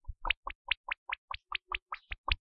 A weird noise I discovered when I was playing with a card. I thought it could be useful!
fish, drip, drops, dripping, cartoony, water, toony, drop